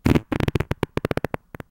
Variation of purely unintended but masterfully orchestrated flatulence recorded with laptop and USB microphone in the bathroom.
bathroom, flatulence